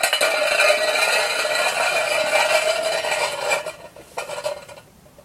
Rolling Can 05
Sounds made by rolling cans of various sizes and types along a concrete surface.
aluminium, roll, steel, can, rolling, tin, tin-can